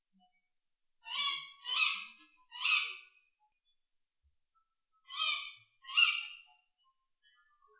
A tawny owl calling in my garden.
Recorded with a Fuji F810 digital camera, I cleaned out the noise and got rid of long silences using Audacity.